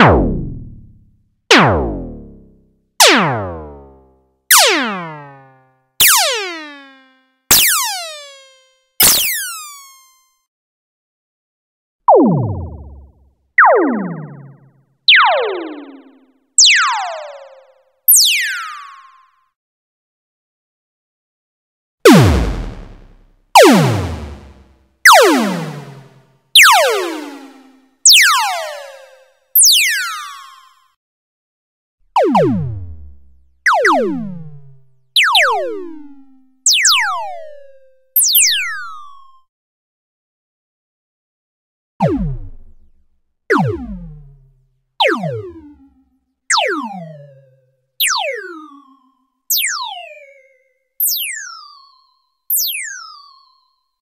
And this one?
Laser compilation 03
Created using the Korg Electribe 2 (the synth variant) analogue modeling synthesis engine and FX.
If you don't like the busywork of cutting, sorting, naming etc., you can check out this paid "game-ready" asset on the Unity Asset Store:
It's always nice to hear back from you.
What projects did you use these sounds for?
space, laser, classic, shot, blaster, simple, fire, short, Sci-Fi, zap, lo-fi, action, ship, arcade, electronic, phaser, retro, game, gun, spaceship, video-game, blast, shoot, shooting, synthetic